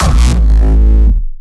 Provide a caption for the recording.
Hardstyle / Hardcore Kick
A new Kick made form scratch. enjoy! (made for 195 BPM)
i would love to hear your results.